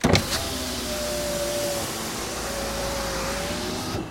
variation 1) I press and hold the button to open my car window, then I release it.
Recorded with Edirol R-1 & Sennheiser ME66.